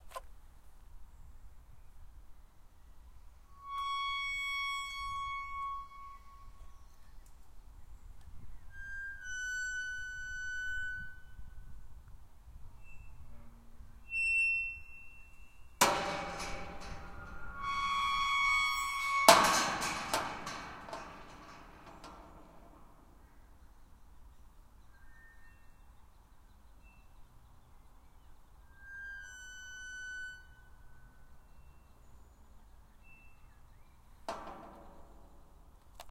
Eeiry Gate within a forrest
I recorded this gate in Ashton Court, Bristol. I recorded it for a surround sound eco-soundscape composition however i felt the need to share as it was probably one of the best sounds i captured. The long hollow poles the gate is attached to creates a spooky reverb that sounds like its coming from the ground itself.
Creak, Creaking, Creepy, Forrest, Gate, Horror, Old, Scary, Stereo, eeiry, foley, spooky